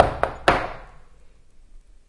This sample has been recorded while knocking on the table.
Used Microphone: Soundman OKM - II professional
knock, table